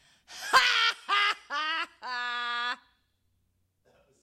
Woman laughs
Sony ECM-99 stereo microphone to SonyMD (MZ-N707)